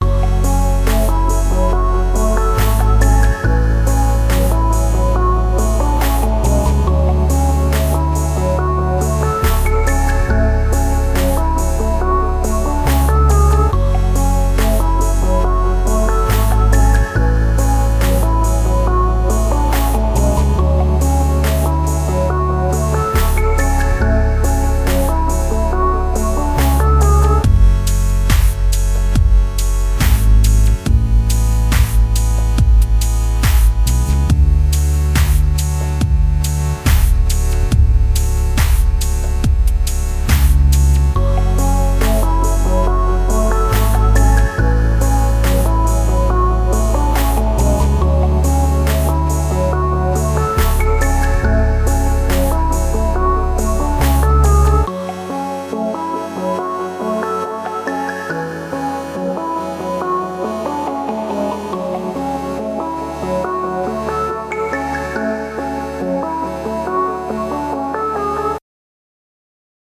Outer Space loop with an ambient feel.